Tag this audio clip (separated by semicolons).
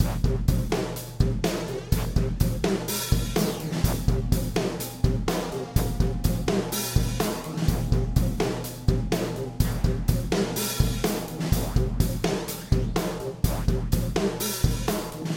125; awesome; beat; bpm; drum; drums; edited; hard; loop; processed; song